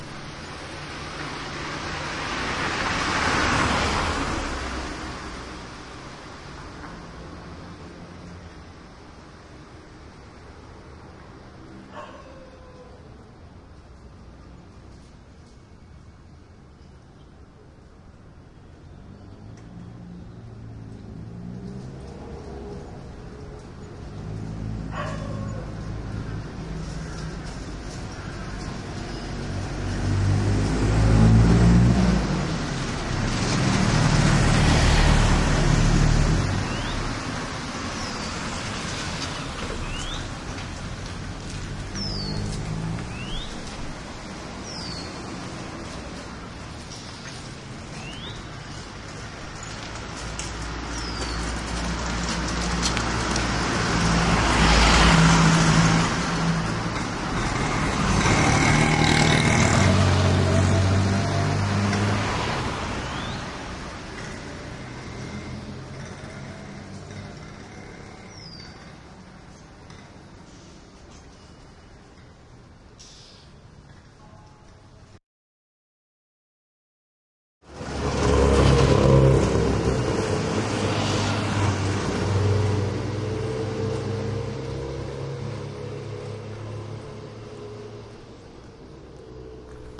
Thailand motorcycles and cars passby cu side street